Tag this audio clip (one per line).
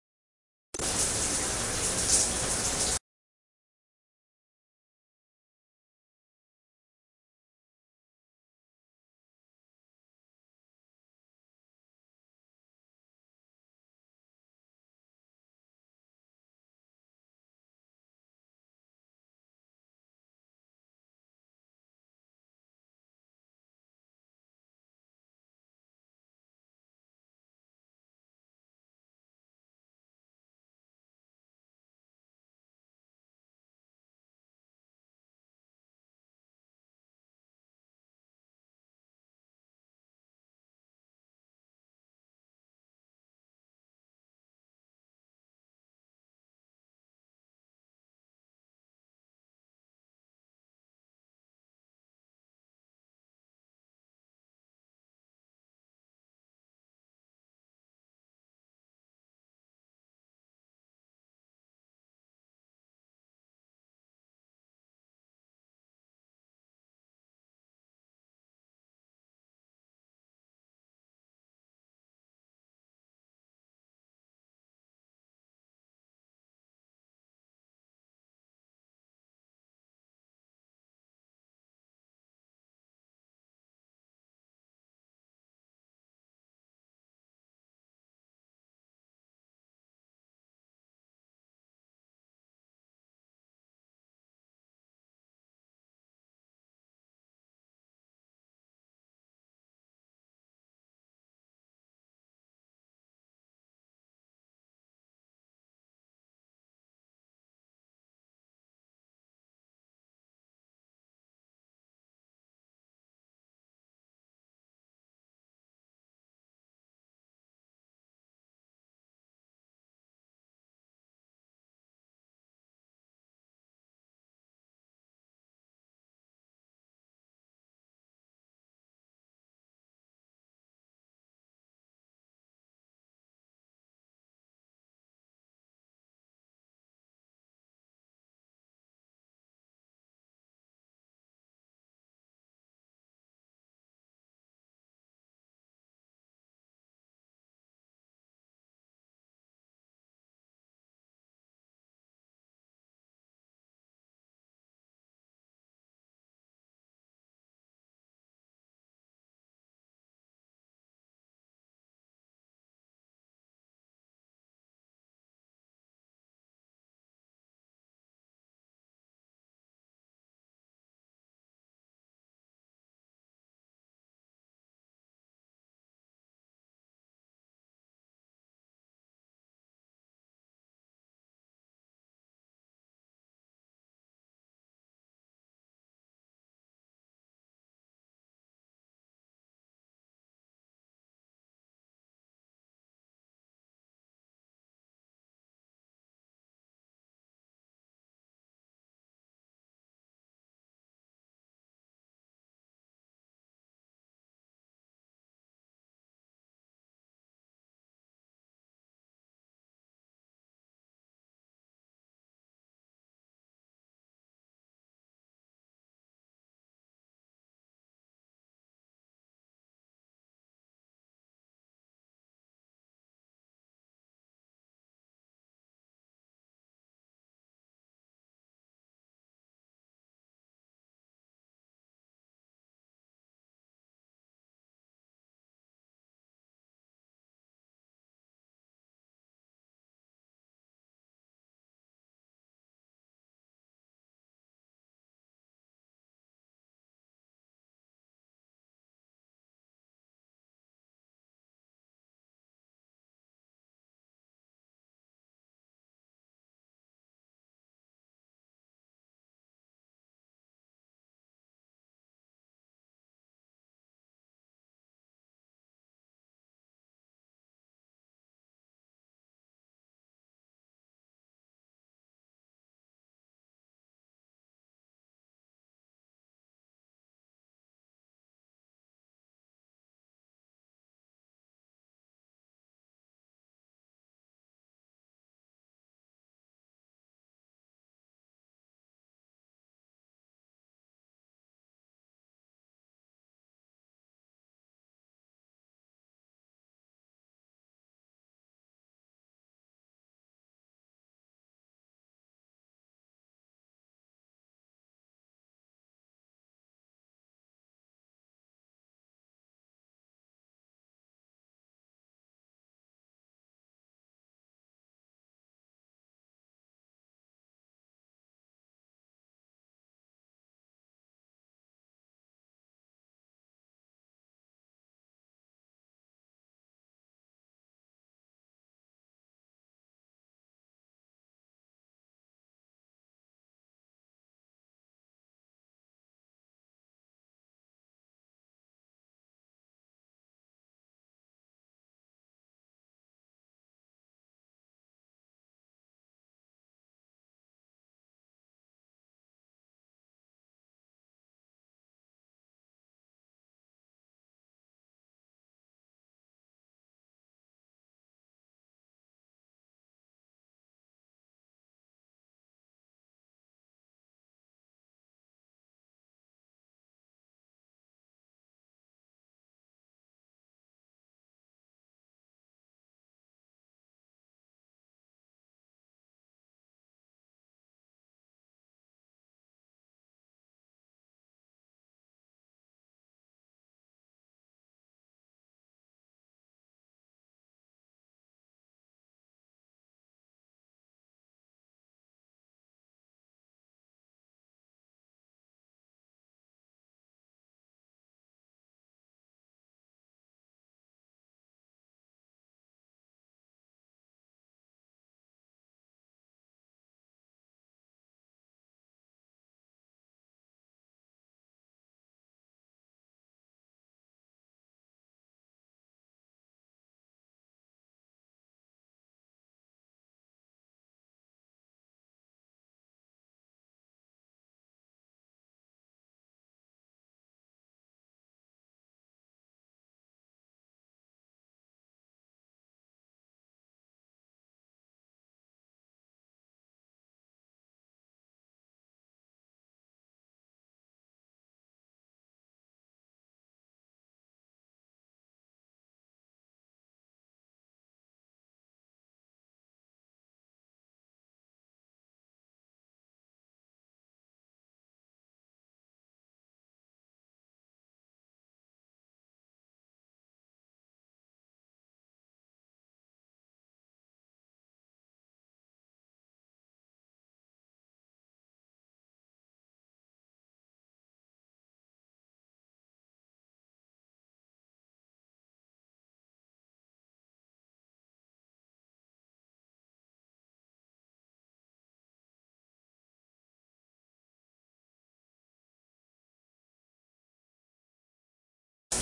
work
me
shower
did